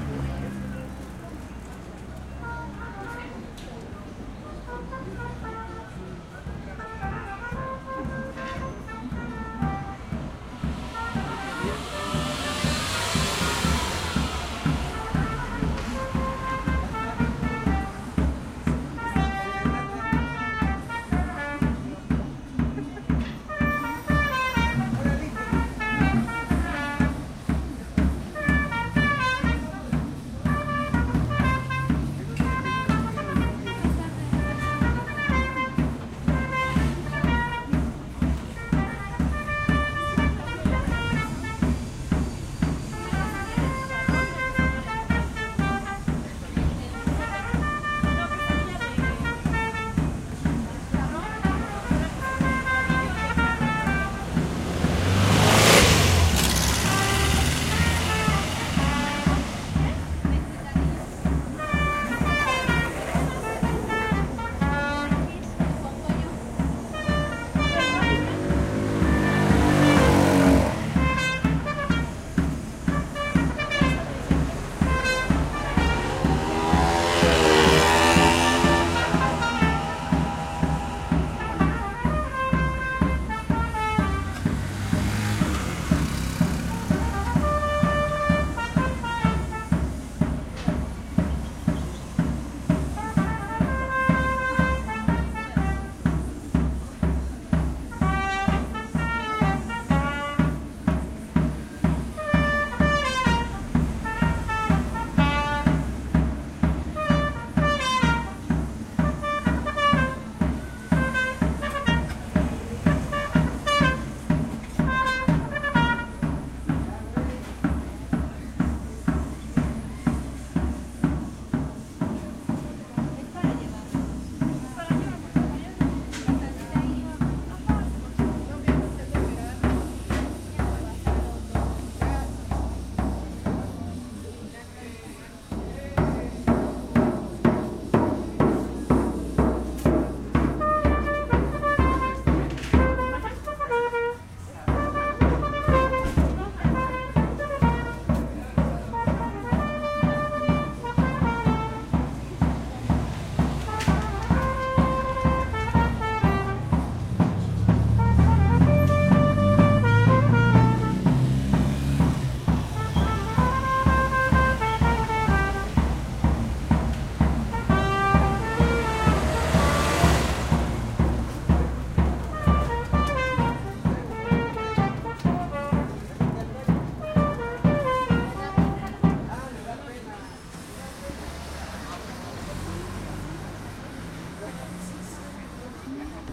A musician, trumpet and drums in streets of Mexico City